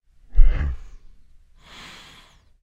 Bear breath, emulated using human voice and vocal transformer